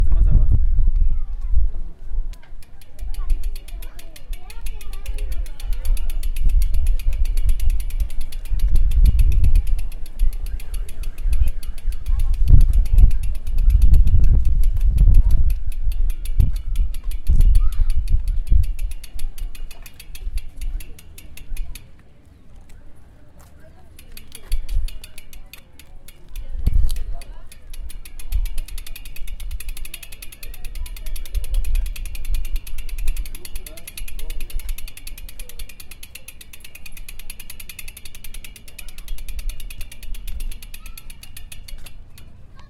Sonido llantas de bicicleta